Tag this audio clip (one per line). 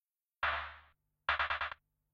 experimental
procesed
glitch